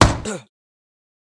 Deep Impact Man OS
Impact Male Voice
Impact, Voice